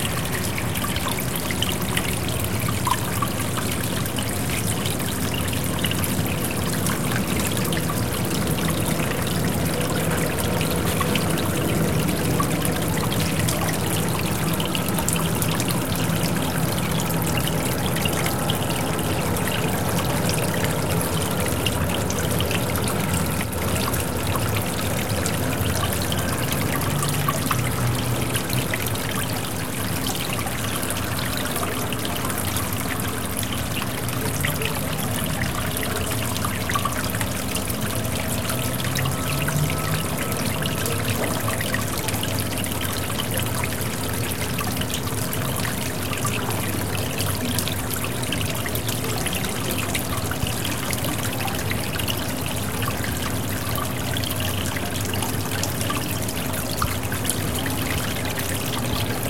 A small artificial lake at my uncle's backyard. Recorded with a Zoom H4n portable recorder.